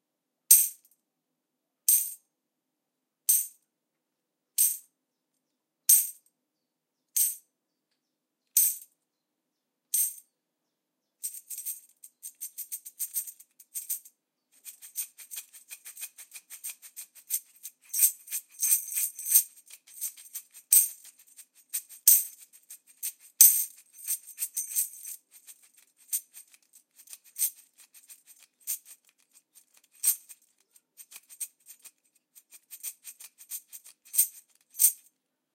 Tambourine recorded by TASCAM DR-40, Stereo
metallic, stereo, Tamb